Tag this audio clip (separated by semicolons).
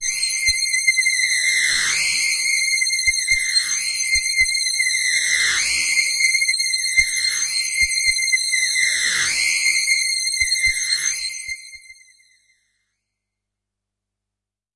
phaser; waldorf; hard; synth; lead; multi-sample; electronic